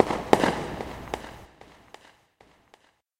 Fireworks loop recorded with laptop and USB microphone. Good for gun sound.